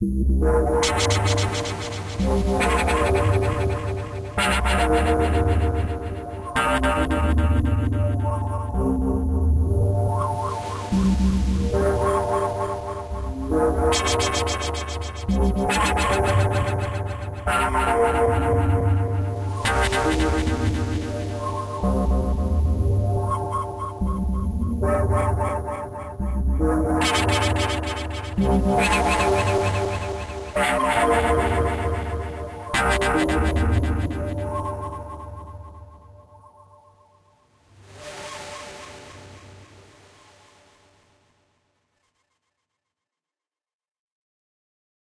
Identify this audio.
This is used by fliter of a vst BP 48 FX using a sharp nice smoothing synth sound
Acoustic, Bass, Beat, Celtic-Harp, Drum, Drums, Ethnic, Faux, Funk, Guitar, Harp, Hit, Japanese, Koto, Loop, Melody, Music, Nylon, Plucked, Rhythm, Snare, Snickerdoodle, String, Strings